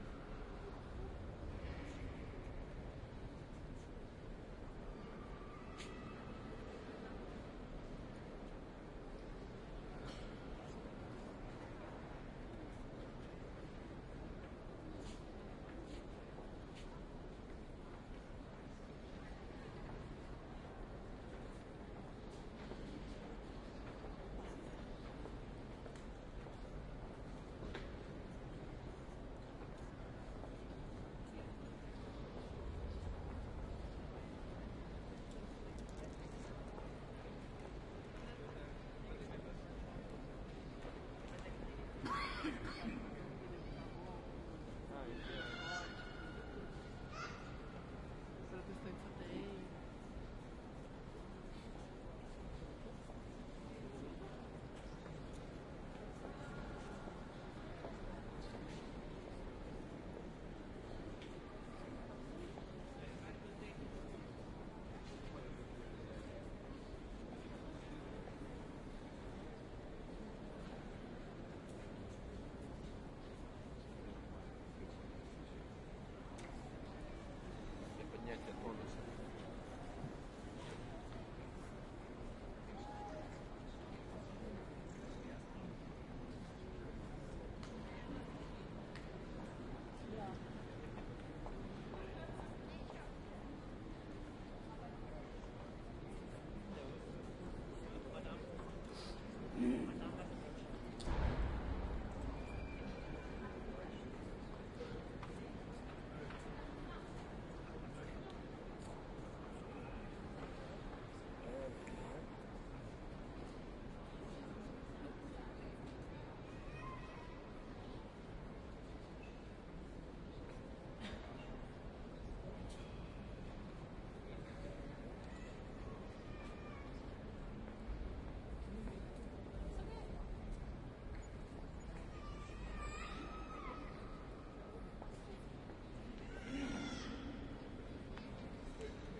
This track was recordered in Cologne Cathedral, one of the world's largest churches, being the largest Gothic church in Northern Europe. It is visited by a lot of people each day. This is what it sounds like inside. R-09HR recorder and OKM microphones with the A 3 adapter.